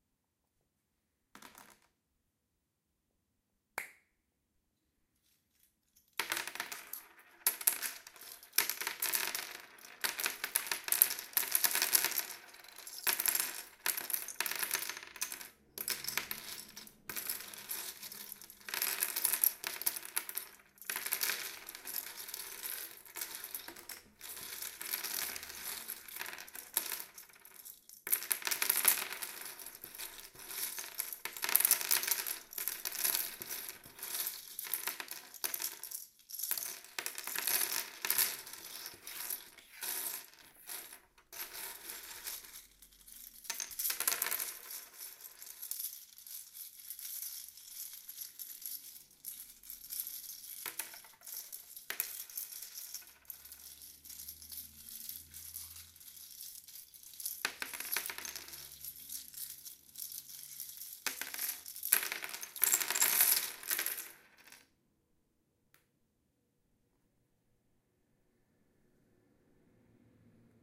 raw recording of goofing around with pins on a wooden desk